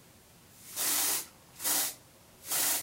Spraying some aerosol, it could be hairspray, deodorant, air freshener etc. three times.